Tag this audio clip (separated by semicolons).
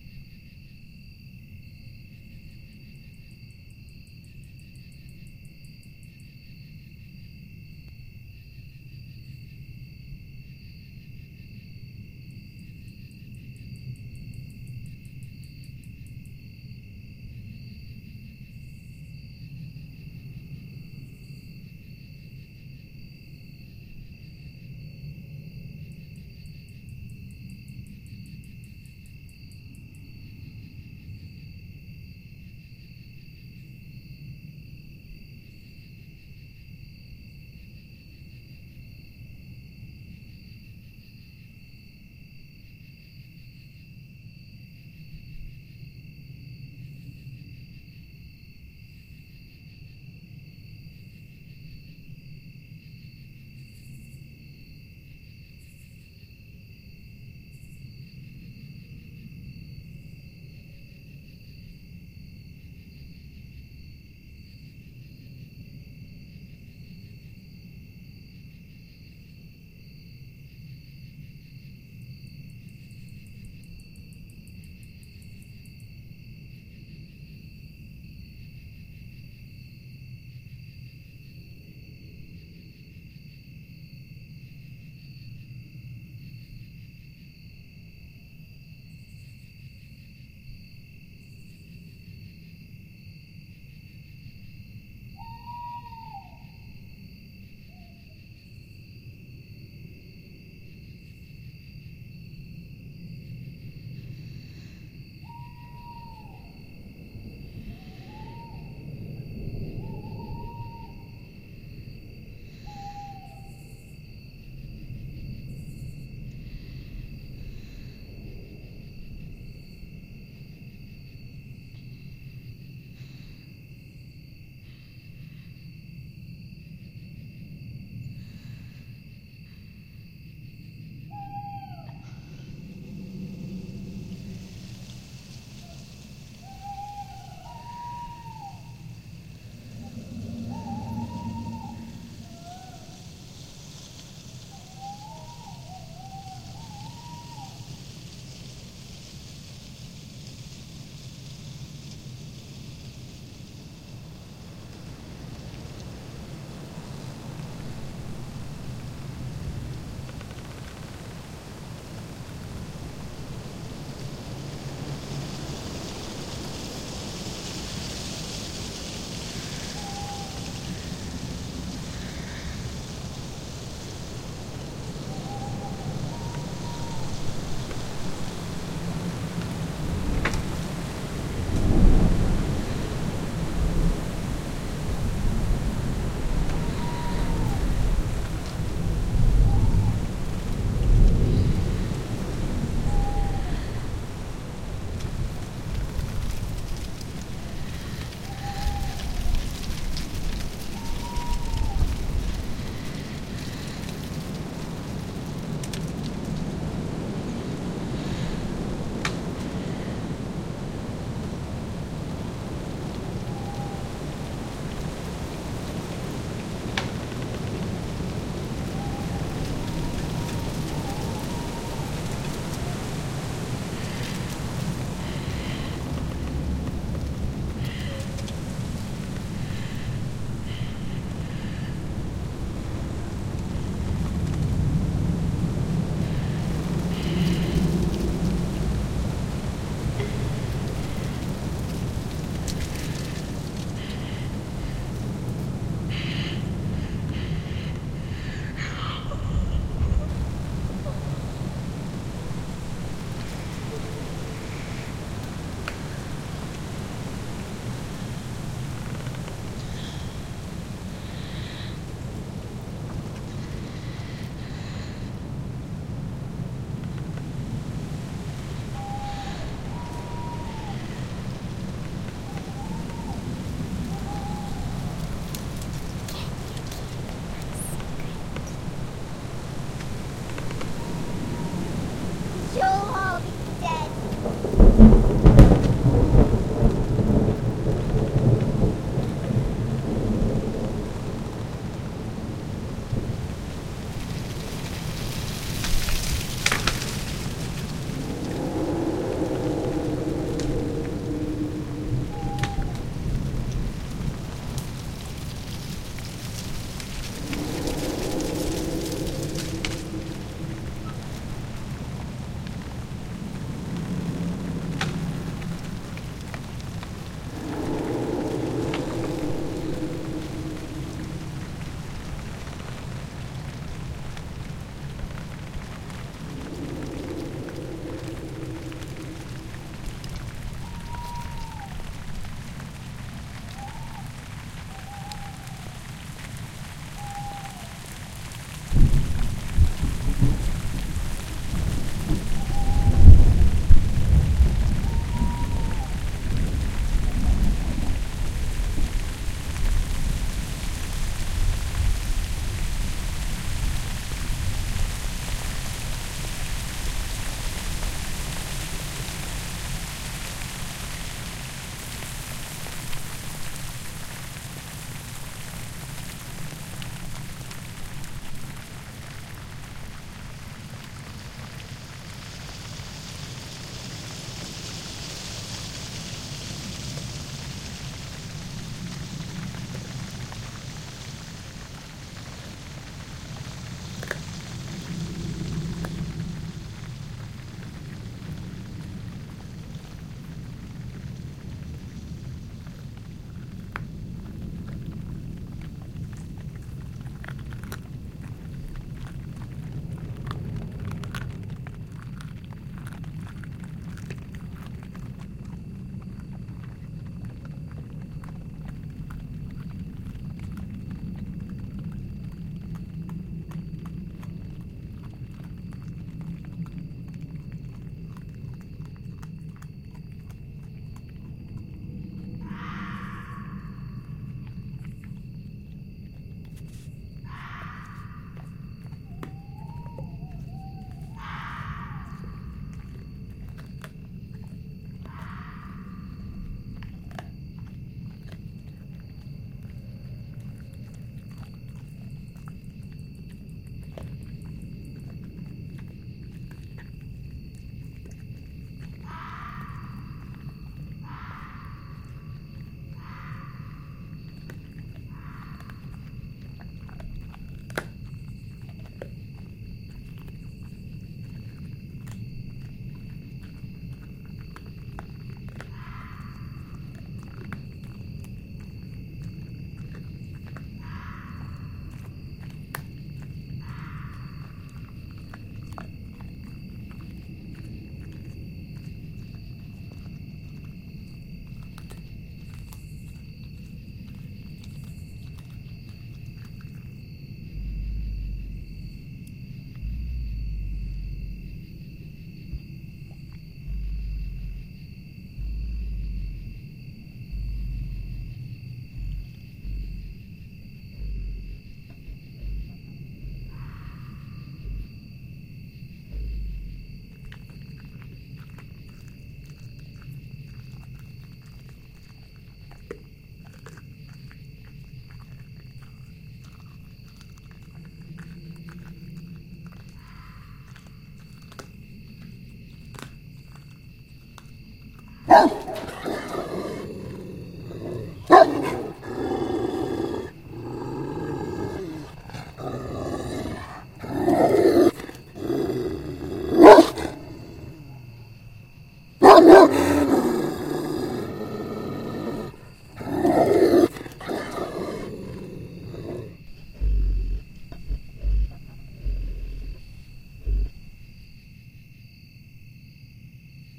background; Halloween; scary